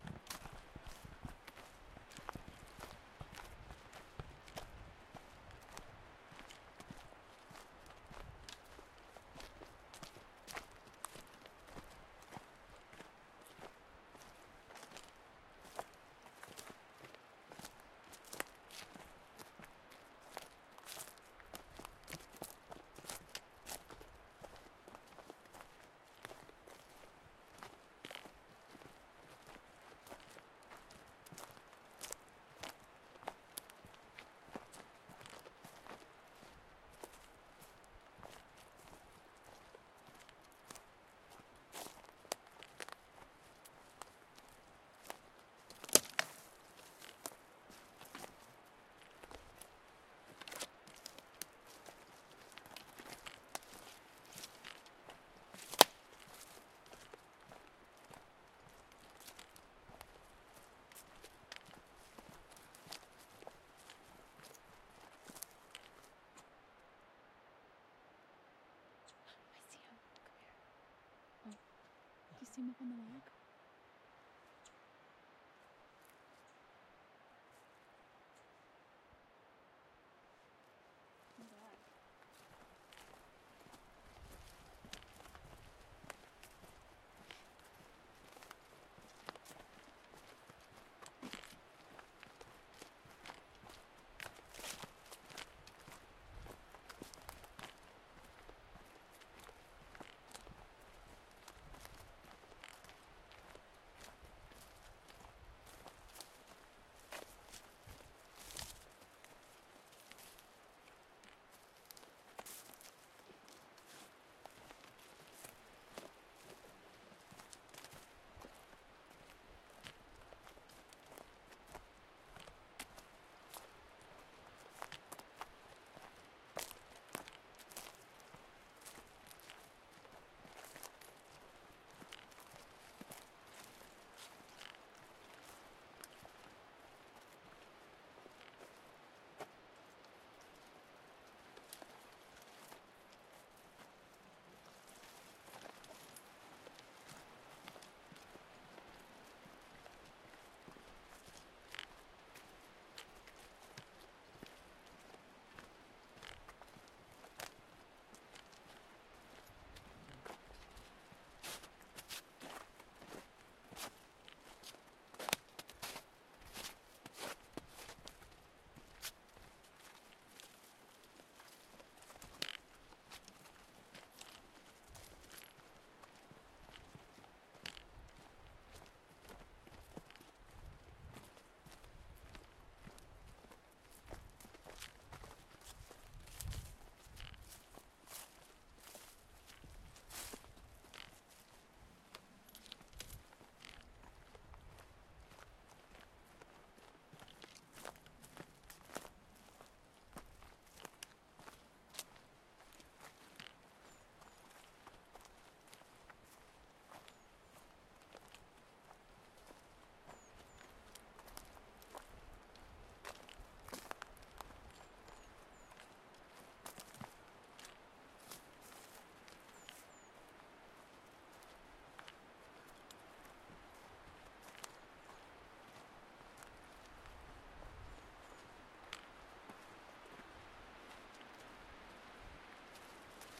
Two people walking through the Oregon forest. Aside from the footsteps, we are both wearing large backpacks and using trekking poles which you can hear from time to time.Recorded in Badger Creek Wilderness with Zoom H4 on-board mics with some minimal noise reduction.